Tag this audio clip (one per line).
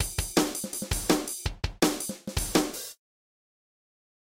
Kick
Amen-Break
Snare
Kick-drum
Breakcore
Heavy-Metal